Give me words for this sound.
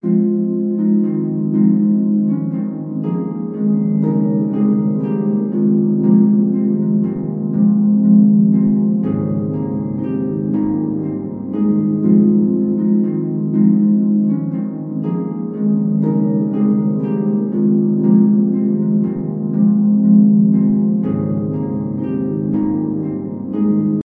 Harp and Pad Fm Complicated Loop
An old loop I made with a harp and quiet pad synth in Logic Pro X. F minor, 120 bpm and in 3/4 time signature.